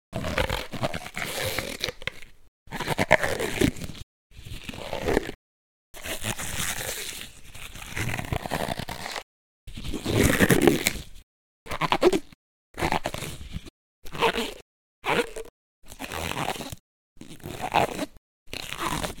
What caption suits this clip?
Balloon-stretches-vocal
The stretching and bending rubber has an oddly vocal quality to it, I think, but could be used in lots of ways. With the high-res, it stretches and pitch shifts well. Recorded with a Rode NT-3 microphone.
vocal, monster, balloon, rubber, plastic, stretching